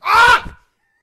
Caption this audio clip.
Male Scream
The sound of a man screaming painfully.